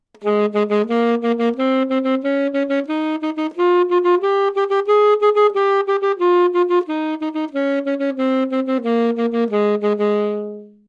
Sax Alto - G# Major
Part of the Good-sounds dataset of monophonic instrumental sounds.
instrument::sax_alto
note::G#
good-sounds-id::6636
mode::major
sax, neumann-U87, GsharpMajor, good-sounds, scale, alto